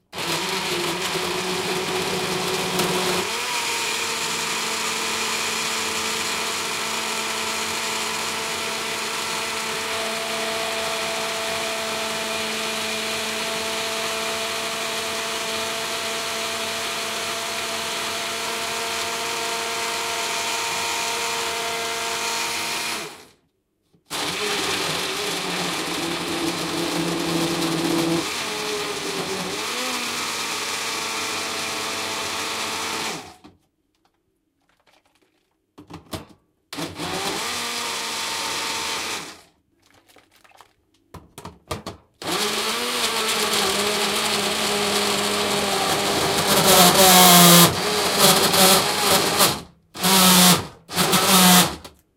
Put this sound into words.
Russel Hobbs Smoothie Maker in action recorded with Zoom H2n.